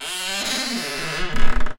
Creaky Door Slow 01
A creaky door opening slowly. Similar sounds and variations can be found in the same sound pack (doors).
close, closing, creak, creaking, creaky, door, doors, frightening, ghost, halloween, haloween, horror, open, opening, scary, squeak, squeaking, wood